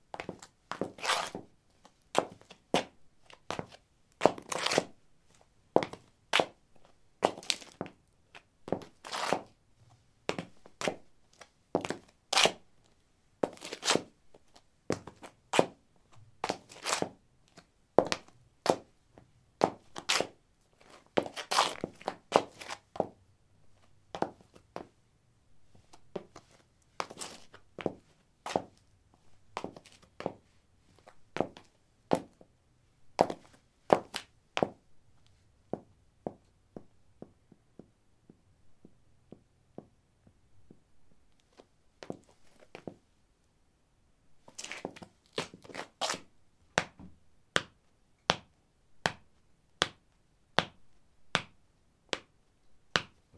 concrete 09b highheels shuffle tap
Shuffling on concrete: high heels. Recorded with a ZOOM H2 in a basement of a house, normalized with Audacity.
footstep, step, steps, footsteps